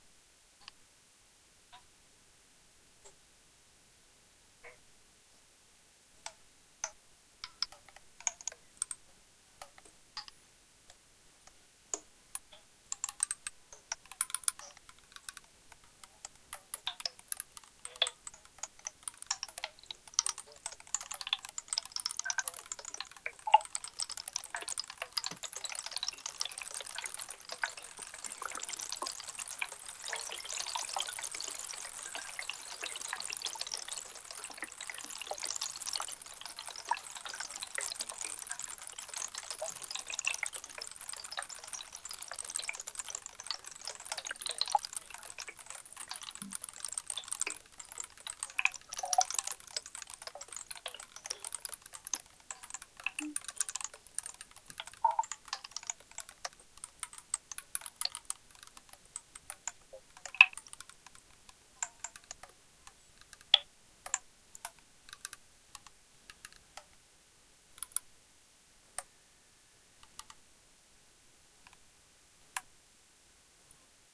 A granular effect applied on a drum sample. It now sounds like water.
water, granular